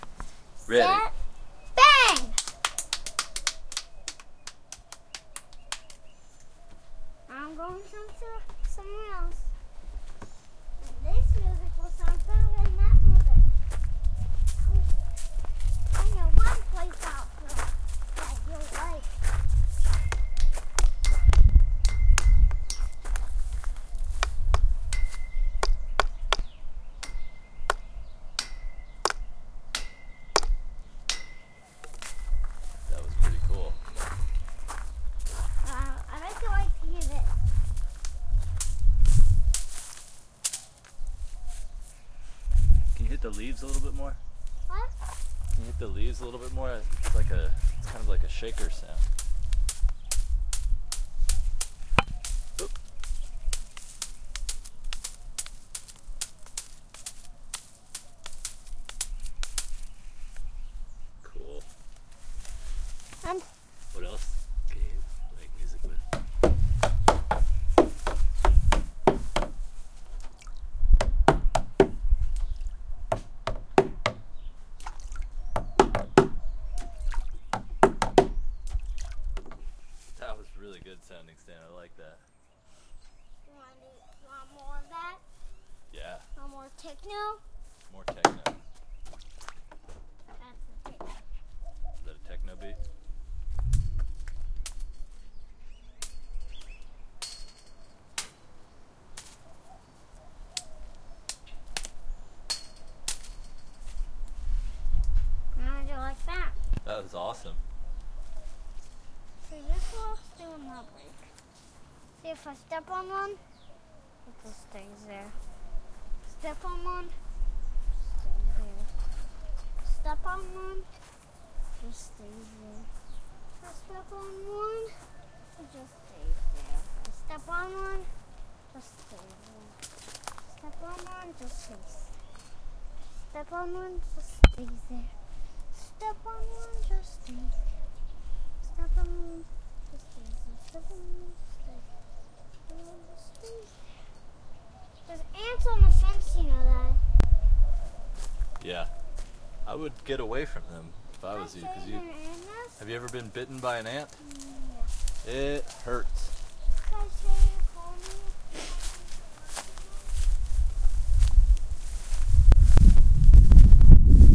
stan backyard banging
my son banging on various things in the backyard
strike, ting, tink, clank, metallic, impact, bang, bonk, ping, hit, metal, percussion, clang, thud